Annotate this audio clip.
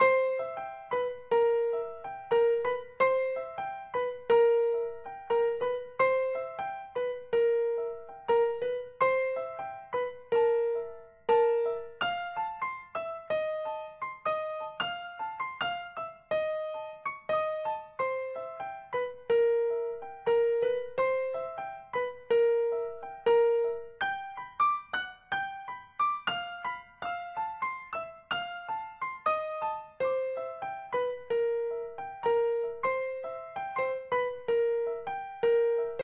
Song4 PIANO2 Do 4:4 80bpms
bpm, Chord, Do, Piano, rythm